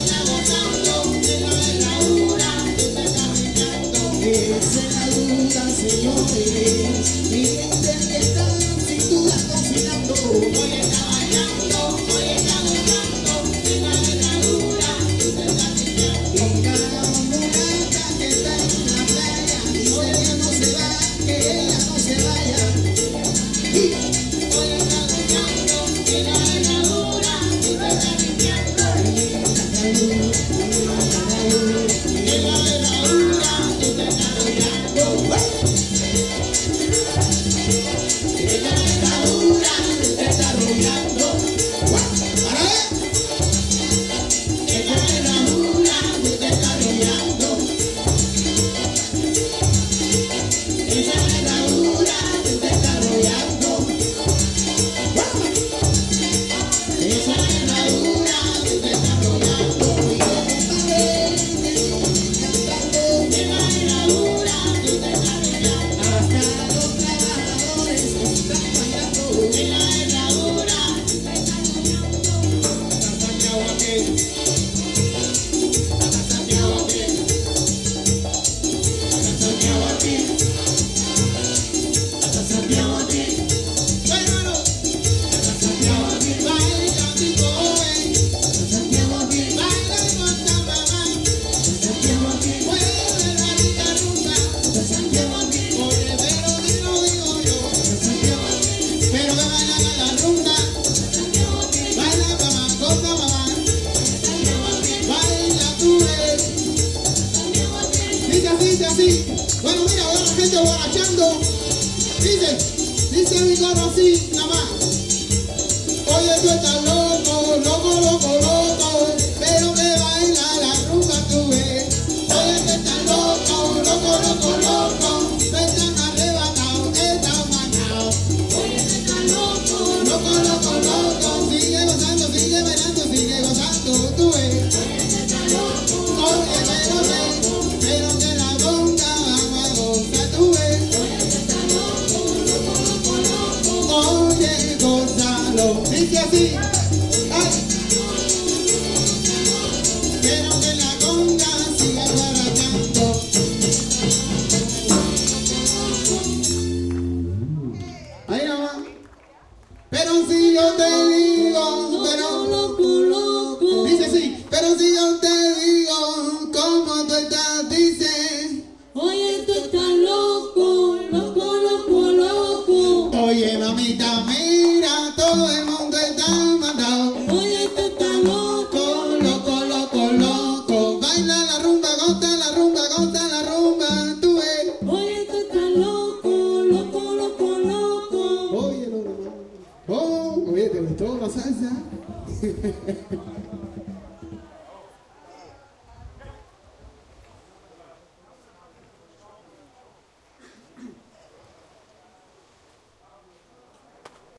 music cuban band live ext
live band recorded with H2 on table maybe 30ft from band, so some exterior resonance off wall that curved around terrace where they played